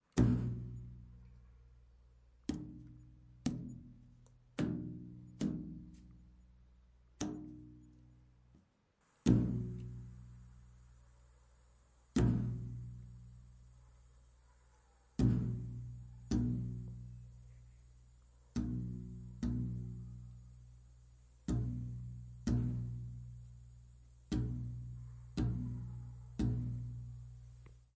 strange bass sound
Weird bass (or kick) sound, played with a belt attached to a small trailer of metal.